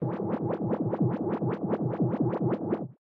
scifi sound
laser, science, sci-fi, effect, scifi, space, fiction, futuristic